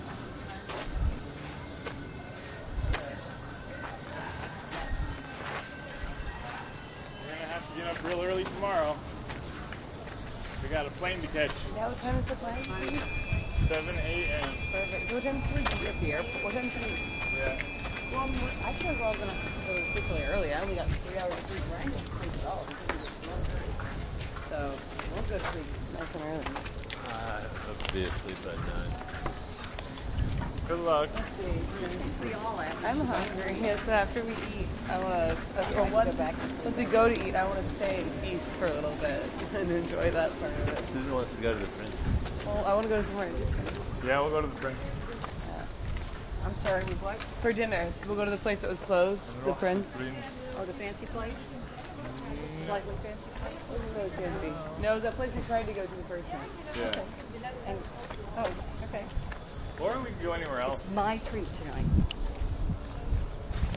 cairo ambience1
The streets of Cairo